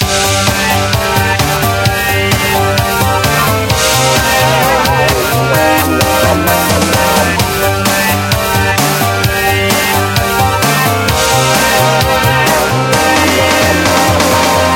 Loop Max Power 05
A music loop to be used in fast paced games with tons of action for creating an adrenaline rush and somewhat adaptive musical experience.
videogame, gamedev, gaming, indiegamedev, game, victory, music-loop, games, Video-Game, videogames, music, gamedeveloping, loop, war, indiedev, battle